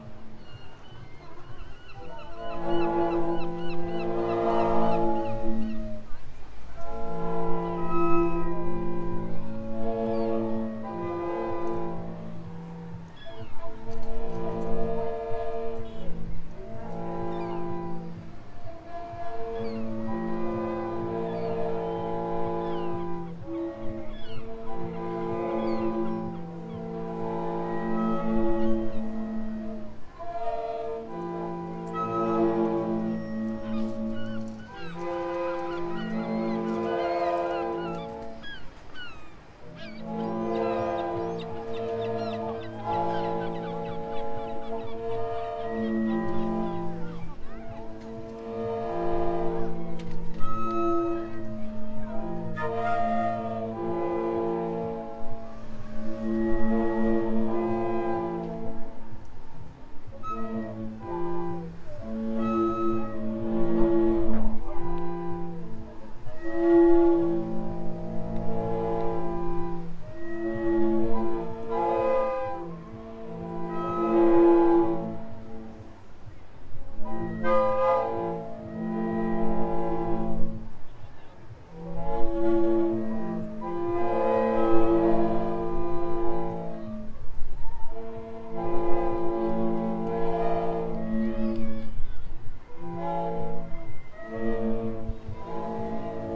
Blackpool High Tide Organ
Blackpool, Blackpool-High-Tide-Organ, England, Liam-Curtin, Tide-Organ, UK, United-Kingdom